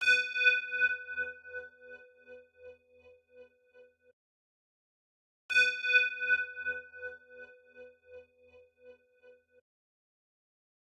W.o.C. BELL SYNTH D 175
bell lead synth Rich and beautiful
Bell, Drum-and-bass, synth